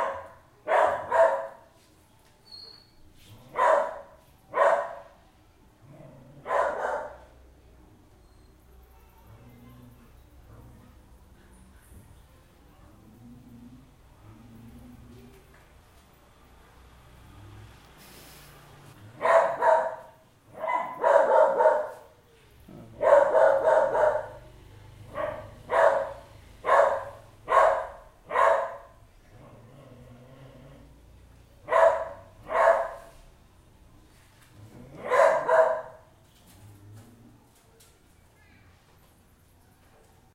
Medium dog, barks at the front door while I'm in the studio